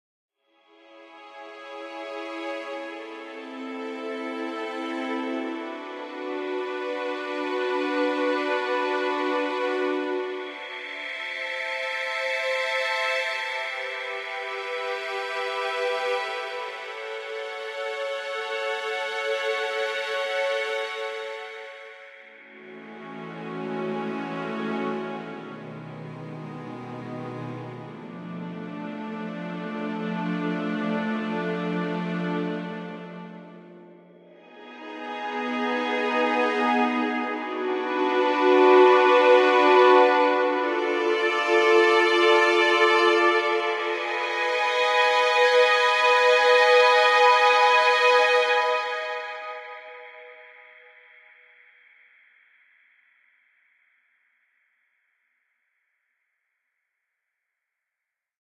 Epic Orchestral Strings
Epic sounding cinematic orchestral classical piece.